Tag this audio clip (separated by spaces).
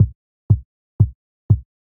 kickdrum groove club drum dry 2 ultra loop dance clean